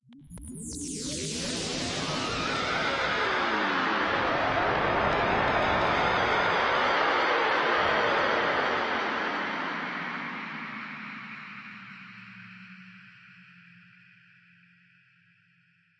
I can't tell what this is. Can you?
A nice swooshy sound recorded in Samplitude.